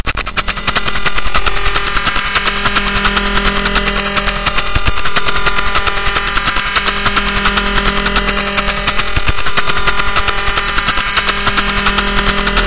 American artillery radar Scunkwork Rr2023